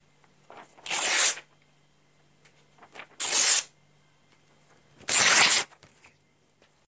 A paper is teared apart.